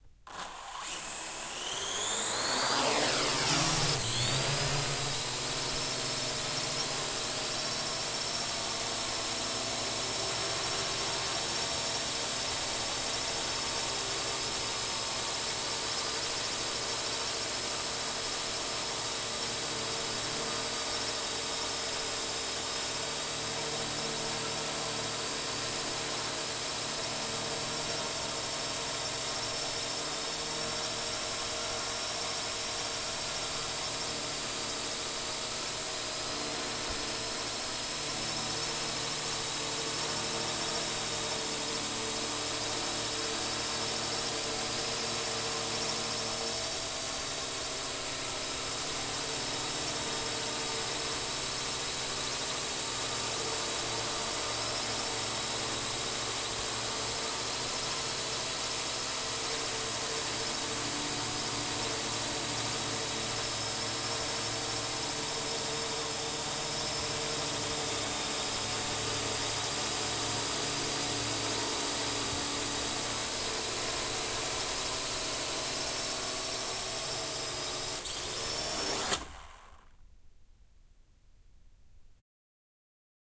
Quadrocopter recorded in a TV studio. Zoom H6 XY mics.